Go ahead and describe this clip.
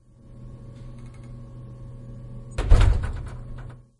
Door hinges closing.
binaural, door, field-recording, music-hall, public-space